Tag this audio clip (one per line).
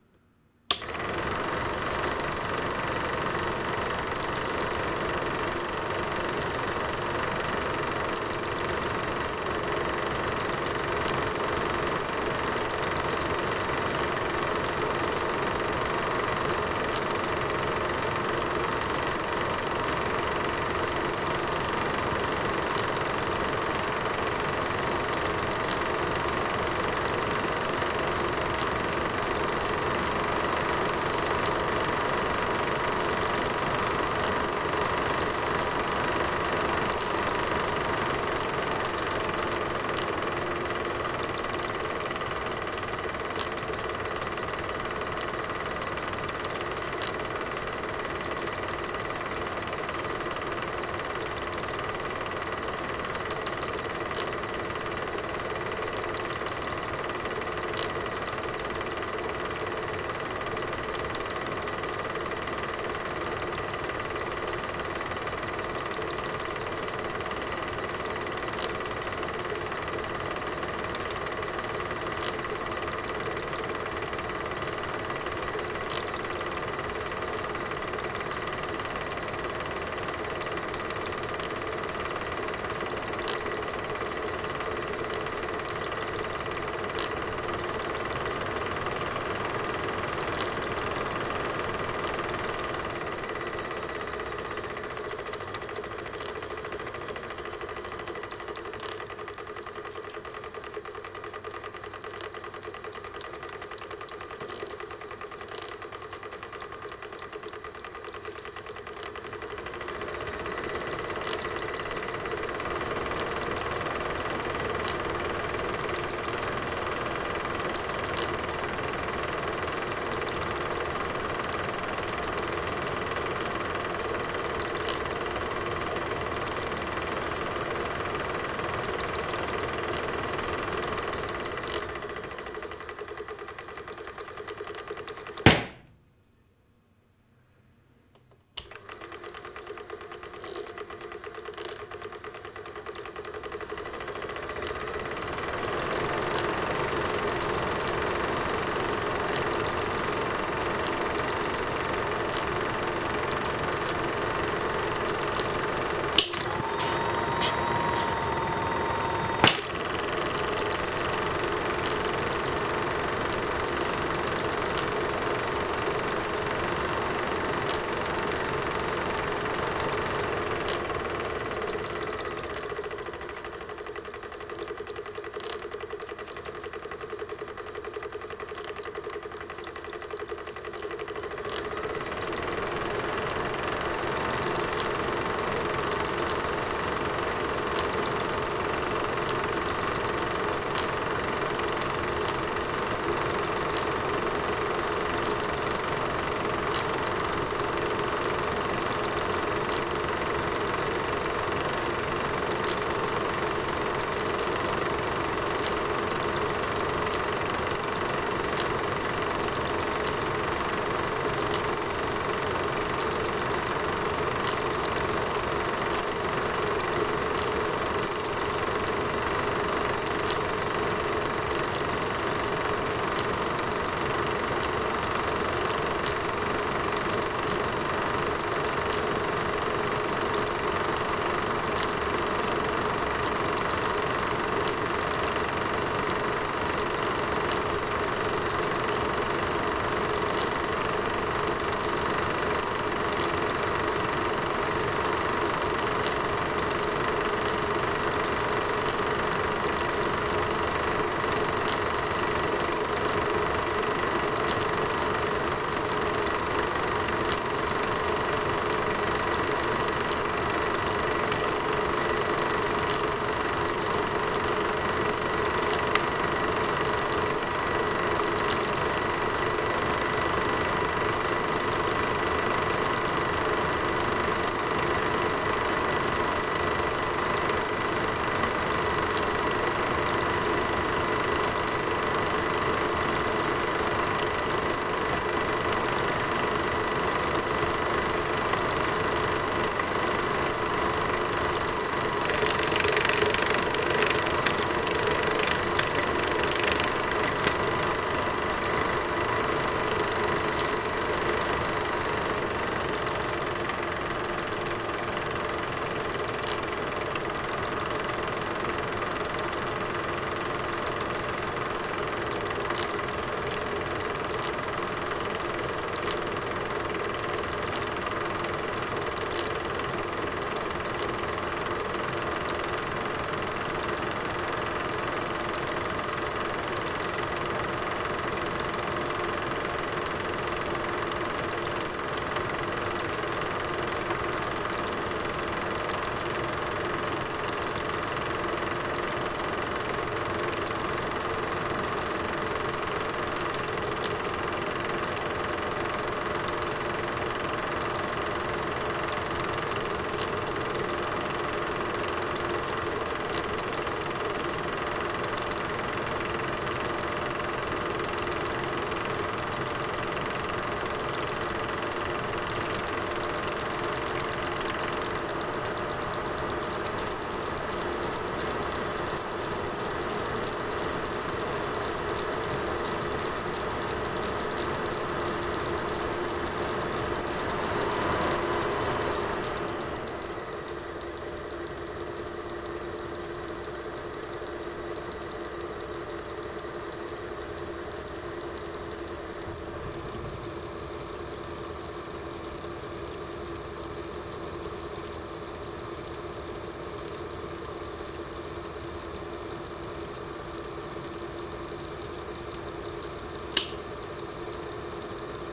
70s
80s
8mm
90s
cine
cinema
coil
electric
film
home
lamp
light
loop
mechanical
movies
projection
projector
speed
start
stop
super8